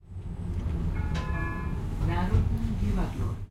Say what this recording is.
INT-prististanice
Noise of trams in the city.
city, station